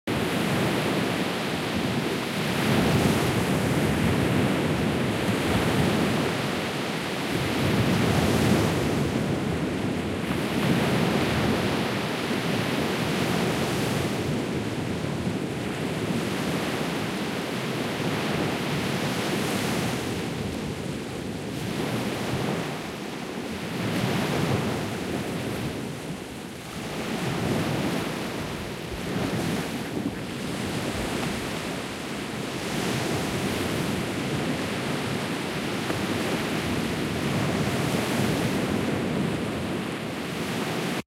Ocean Waves by the Baltic Sea (Stubbenkammer).
Recorded with a Zoom H4n.
Thank you for using my sound!